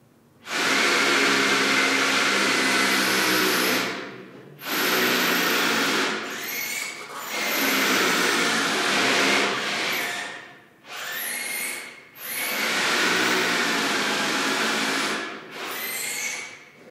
Some workers under Paris recorded on DAT (Tascam DAP-1) with a Sennheiser ME66 by G de Courtivron.